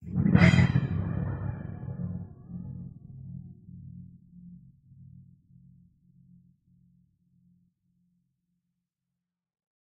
BS Wobble 7
metallic effects using a bench vise fixed sawblade and some tools to hit, bend, manipulate.